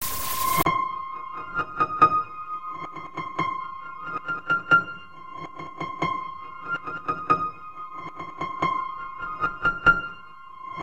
a processed piano loop from a horror film i scored; made with Native Instruments Kontakt and Adobe Audition
electronic, piano, sequence, loop, processed, eerie